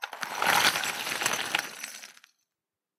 Forge - Adding coal
Coal being added to the forge using a shovel.